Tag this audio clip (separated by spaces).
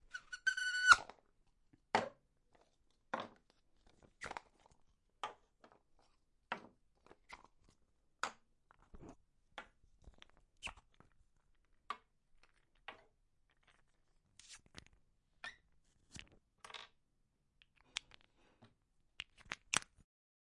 doll opening-russian-doll OWI Russian-doll wooden-doll